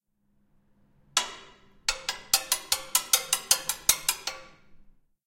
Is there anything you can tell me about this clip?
Geology Stones and Bars
This recording is of a stone ringing against the bars holding up the banister of a spiral staircase in the geology library at Stanford University
aip09, bar, hit, ring, stairs, stanford, stanford-university, stone, strike